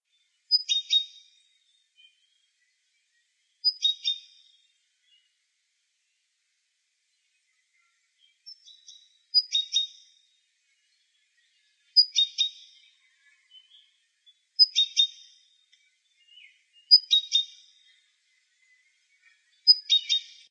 repetitive, high-pitch call of a little bird, probably a Great tit.
Band-filtered (1-8 kHz), background noise removed, and amplified /
canto agudo y repetitivo de un pajaro pequeño, probablemente un carbonero.
south-spain, nature, field-recording, birds